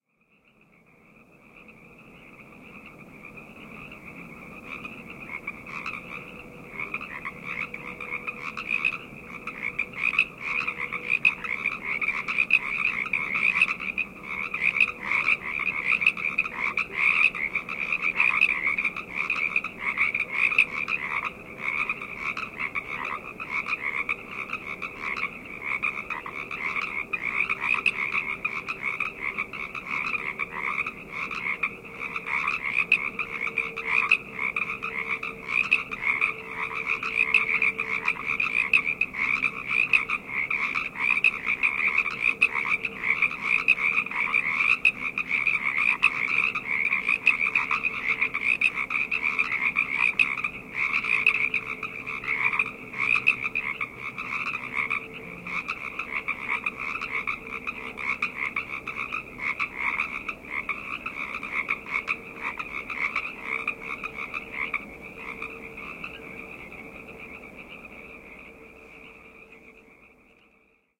sherman frogs 11 04mar2010
Recorded March 4th, 2010, just after sunset.